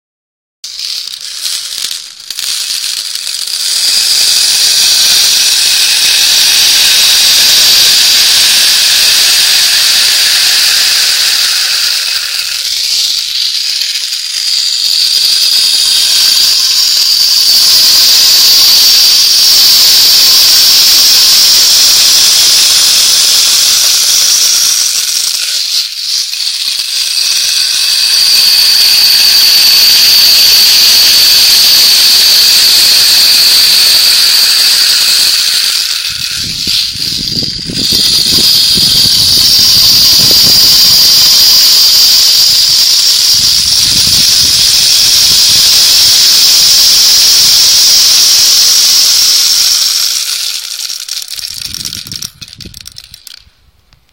plastic rainstick 002
Sound of small metallic balls passing through a plastic rainstick.
fx, pal-de-pluja, palo-de-agua, palo-de-lluvia, percussi, percussion, pl, plastic, rain, rainstick, shaker, sound-effect, stic, stico